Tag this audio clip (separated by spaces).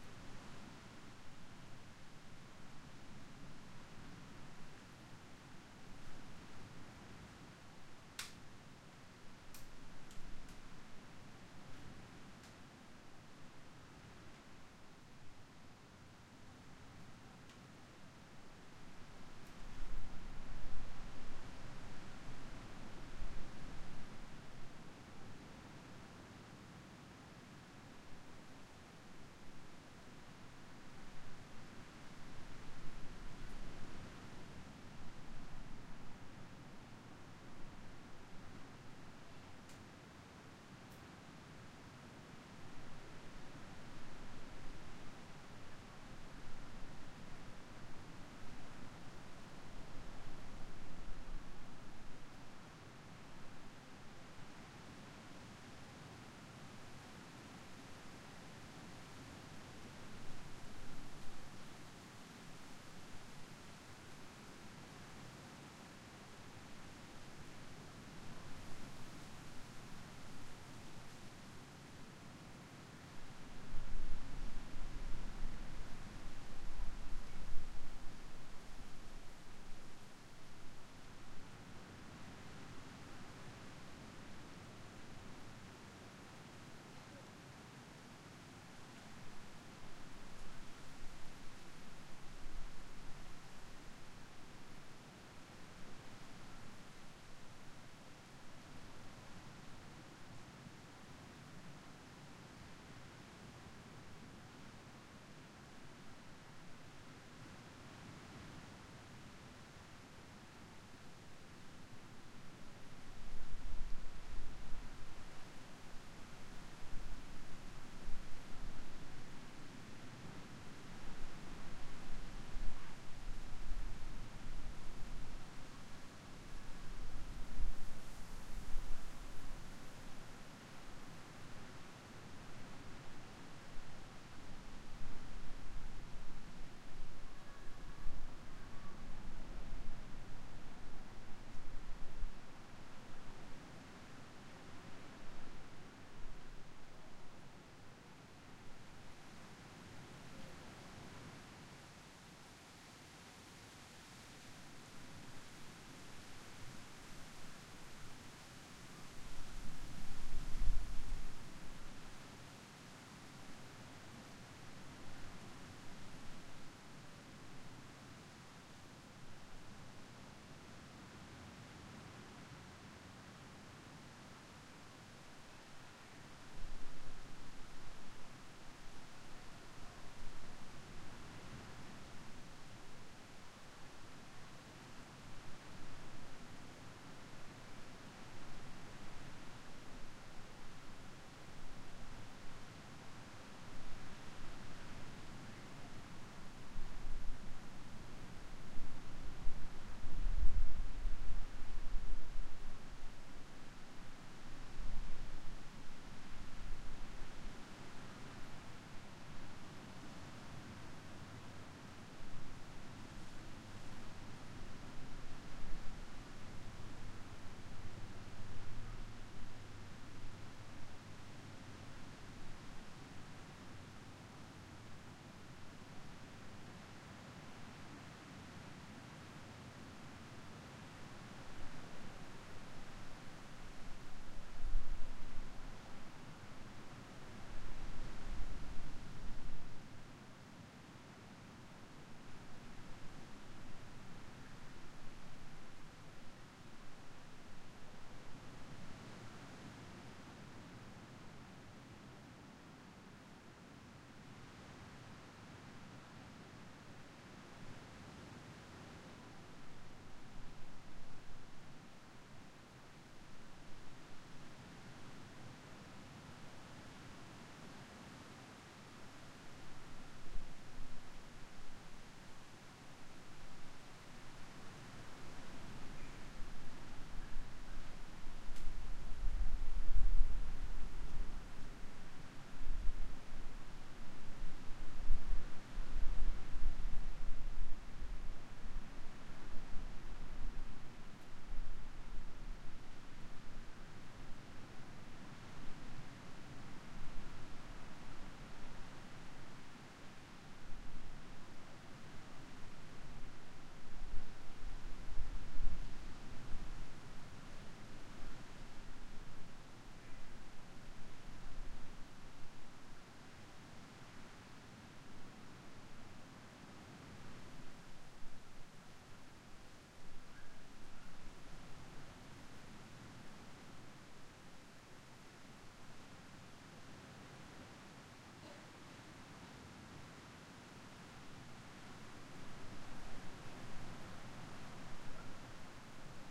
hotel republic